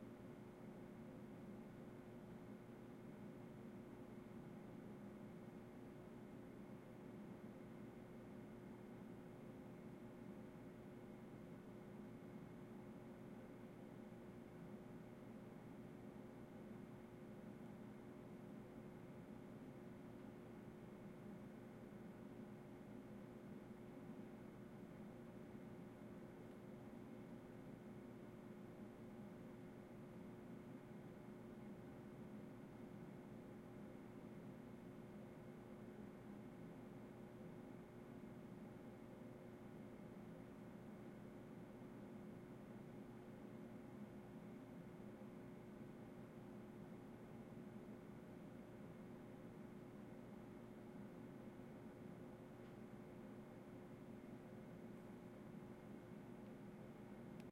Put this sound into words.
basement with fridge room tone
Room tone recorded in a basement room with fridge hum.
interior, room, refrigerator, basement, tone